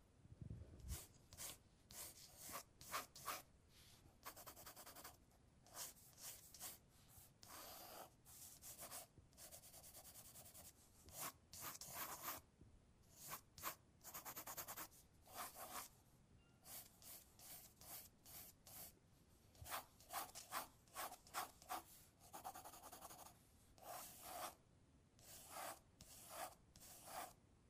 sketchbook-drawing-pencil-sounds
The sounds of a pencil sketching on a page of a large sketchbook. Hope it is useful!
lines, pencil, draw, sketch